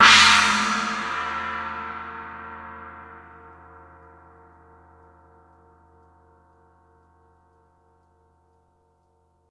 Chinese Cymbal 46 2
A natural sound of Chinese Cymbal.